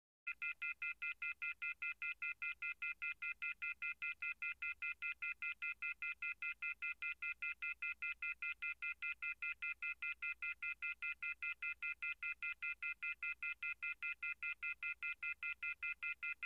Phone off the hook signal
Phone receiver left off the hook / signal from a Western Electric model 500 rotary telephone. Busy signal.
busy, hook, off, phone, receiver, ring, signal, telephone, tone